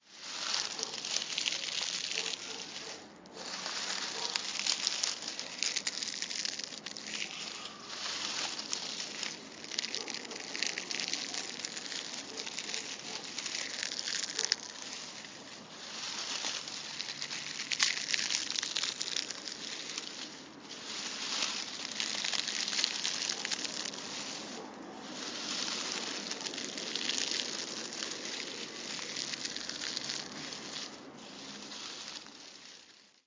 This recording of crushing dry leaves was recorded for the workshop of Sound Catchers at Milton State School, Brisbane for the Science Show 2015.